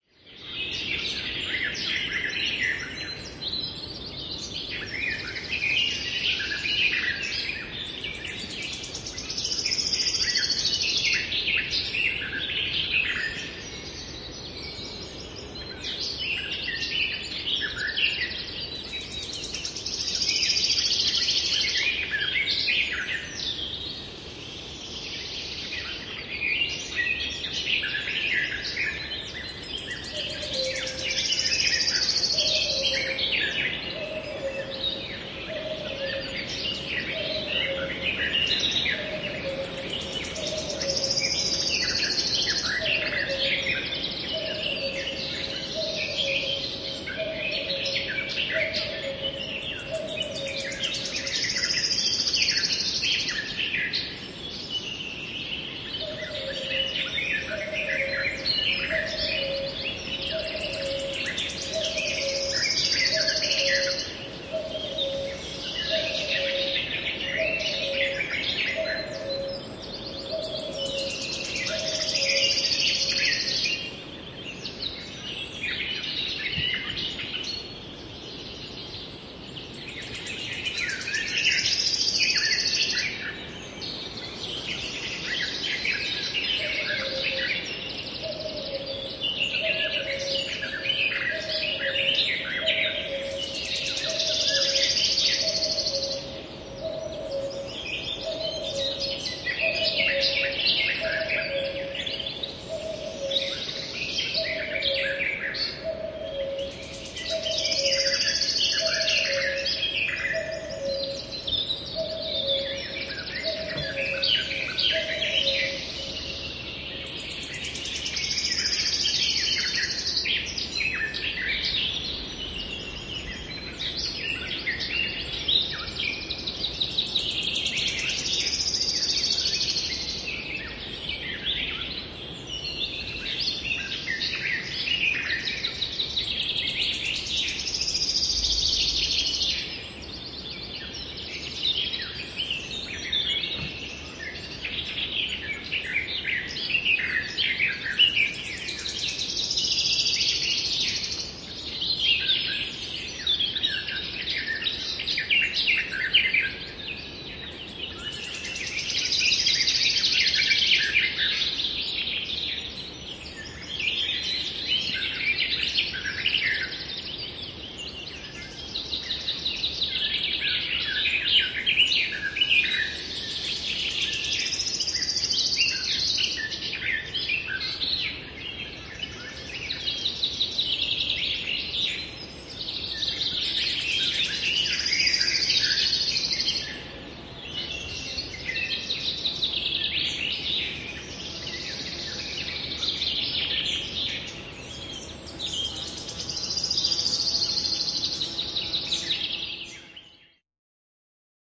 Linnunlaulu, lintuja metsässä aamulla / Birdsong, birds in the forest in the morning
Metsä, kesä, pikkulinnut laulavat vilkkaasti aamulla, etualalla lehtokerttu ja sirittäjä, käki kaukana. Taustalla metsän huminaa.
Paikka/Place: Suomi / Finland / Parikkala, Uukuniemi
Aika/Date: 09.06.1998